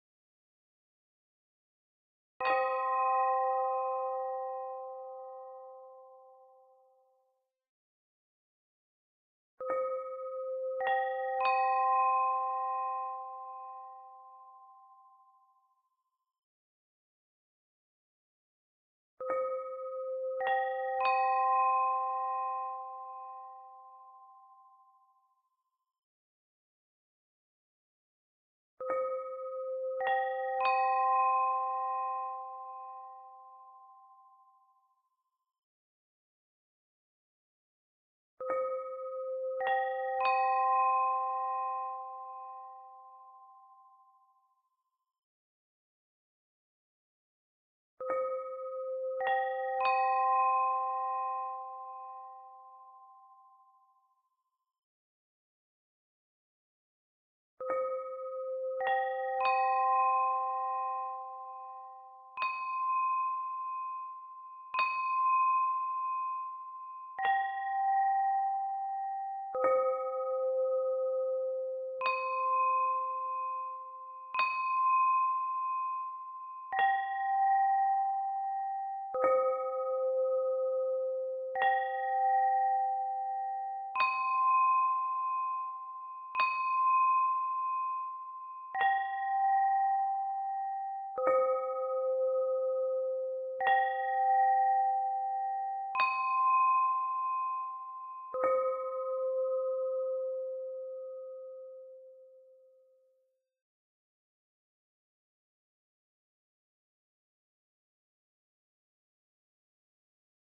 Meditation Bowls
Meditation bowl sound for calmness and spirituality